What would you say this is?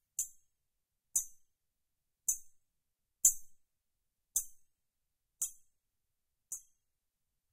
Metal,Grate,Floor,Hit,Pickaxe,Hammer,Thingy,Great,Hall
Part of a series of various sounds recorded in a college building for a school project. Recorded with a Shure VP88 stereo mic into a Sony PCM-m10 field recorder unit.
sfx, field-recording, school